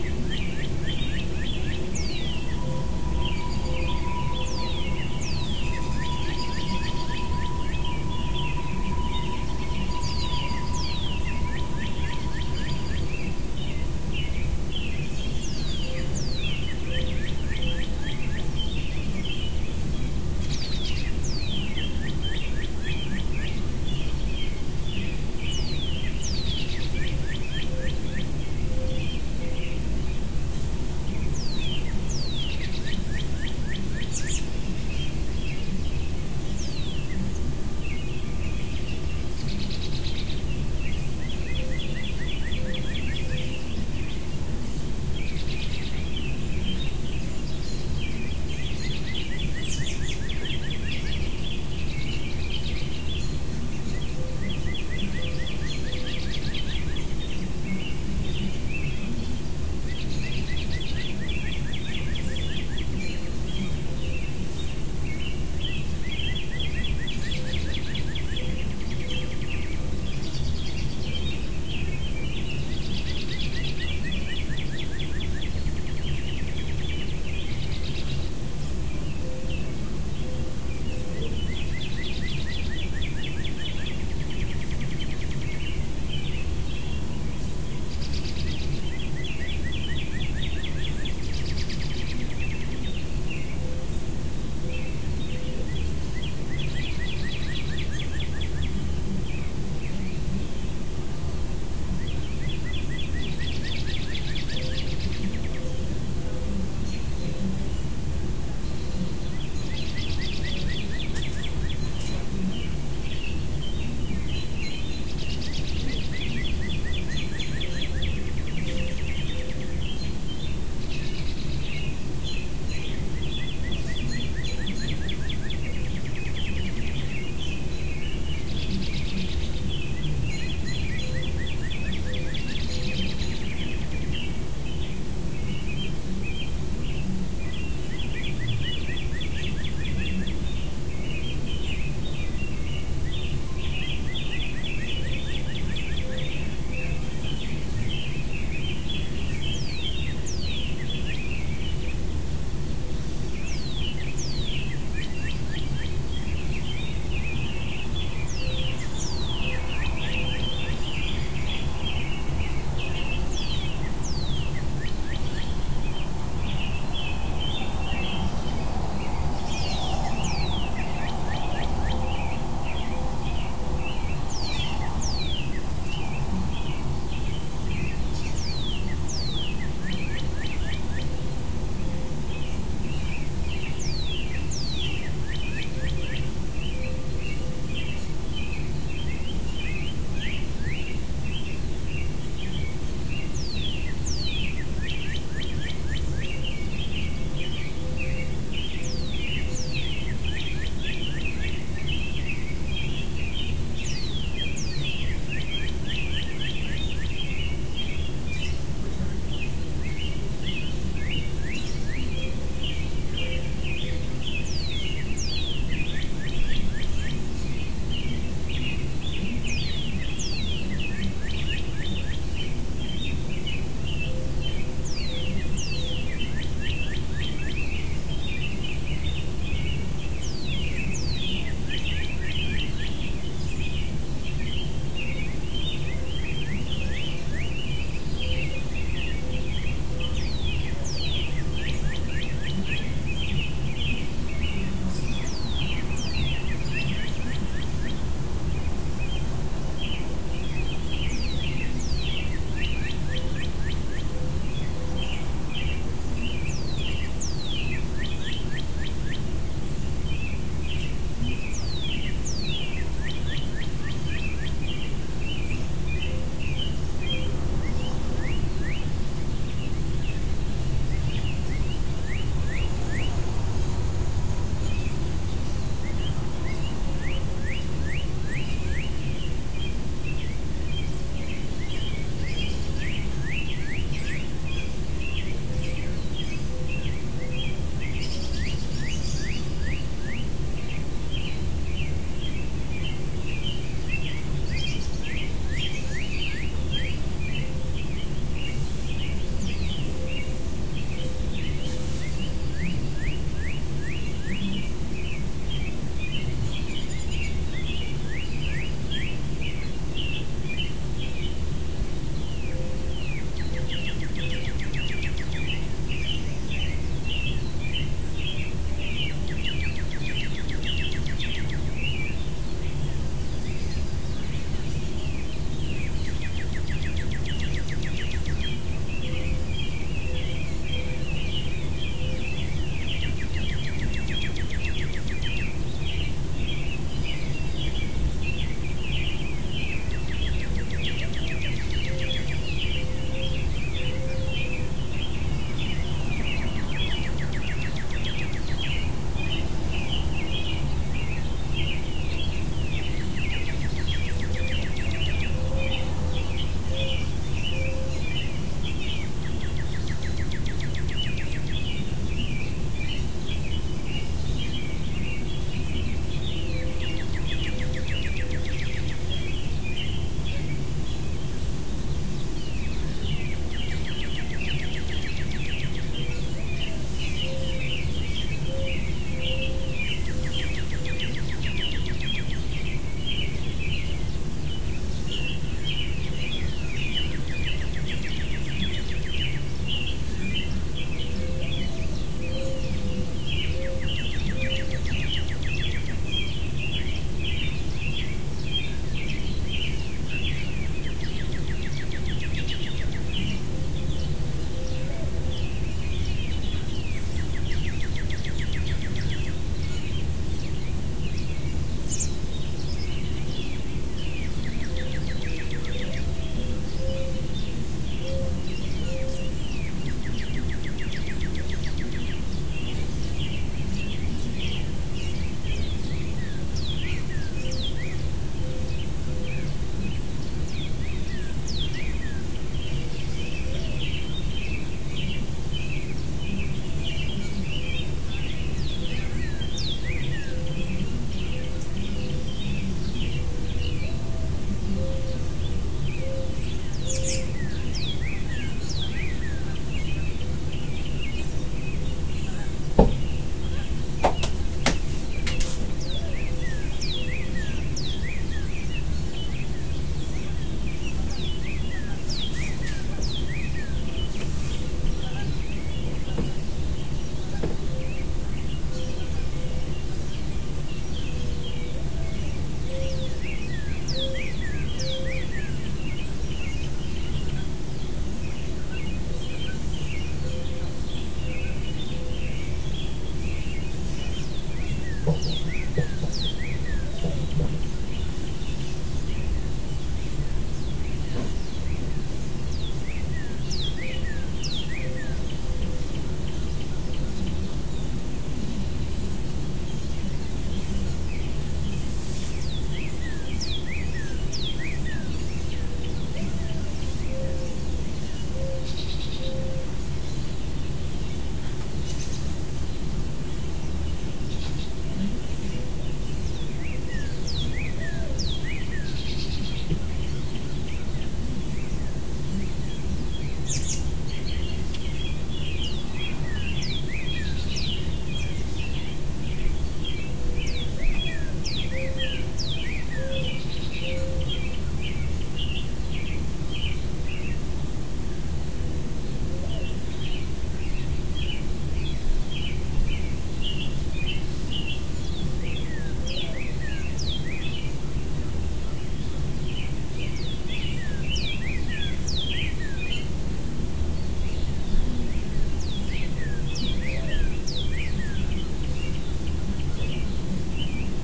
Morning Birds2 04-16-2016
Recorded from the bed near my windows with Lifecam hd3000 mostly American robins and red cardenals singing, a bit of a train breaking through town at the beginning. Near the end you can hear a couple distant geese that never got very close, and my mother walking outside my closed door which makes the floor and walls in this old apartment house crack and pop.
American, town, USA, ambient, nature, breaking-train, window, chirp, train, field-recording, US, tweet, spring, cardenal